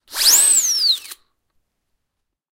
Whizzing Lip Whistle, A
Raw audio of a plastic party toy - a lip whistle - being strongly blown. The mechanism jams before it is allowed to decay naturally (what can I say, they were a dollar). The whistle was about 10cm away from the recorder.
An example of how you might credit is by putting this in the description/credits:
The sound was recorded using a "H1 Zoom recorder" on 17th September 2017.
blow, lip, party, plastic, whistle, whiz, whizz, whizzing